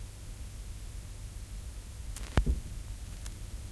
Record Player - Placing Needle on a Track
Recording of a record player on a vinyl long playing record